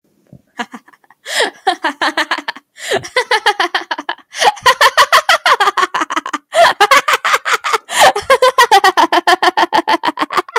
Laughing in a Mic
I laughed but it was hard because I had to fake it. Enjoy!
creepy, evil, funny, laugh, scary, witch